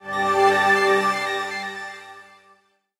Healing 3 (Soothing Rinse)
An sound effect intended to evoke a sense of calm after a healing item in a video game is used. Could be used for lots of other things, though.
Produced in Ableton.
restore, heal-fx, video-game-heal-sound-effect, restore-sound-effects, healing-sound-effect, restore-sound, video-game-sound-effect, video-game-sound, heal-sound, video-game-sounds, heal, healing, healing-sound-effects, effects, restore-sounds, video-game-heal-sound, heal-sound-effect, healing-sound, healing-sounds, heal-sounds, restore-sound-effect, heal-sound-fx, video-game-heal